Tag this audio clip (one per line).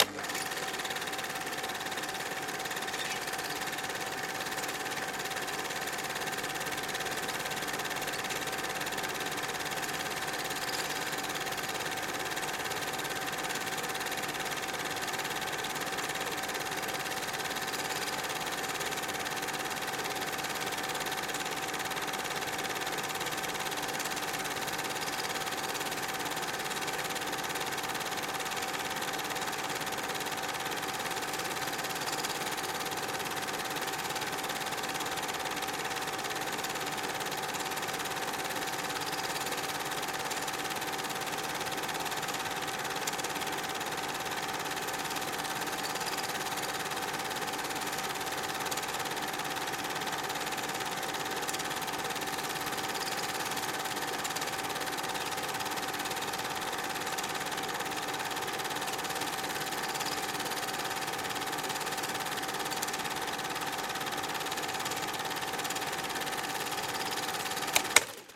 environmental-sounds-research
start
single8
stop
film
run
projector
super8
8mm